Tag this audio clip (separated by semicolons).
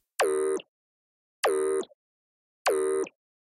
blip; communication; drone; oblivion; signal; ui